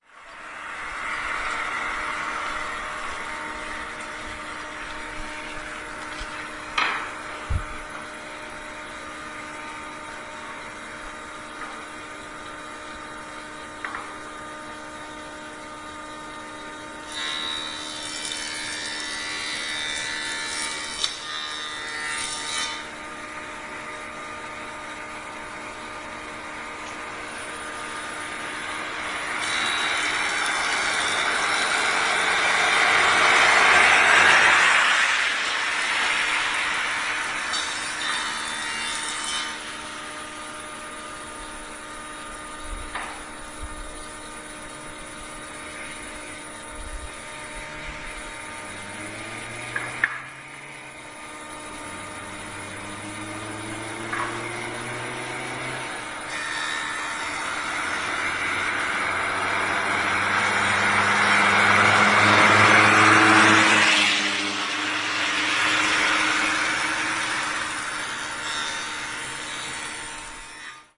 08.09.09: about 20.00; Tuesday in Sobieszów (one of the Jelenia Góra district, Lower Silesia/Poland; Eugeniusza Romera street, the sound producing by the saw in the carpenter's shop + passing by cars
w, carpenter, street, industrial, sobiesz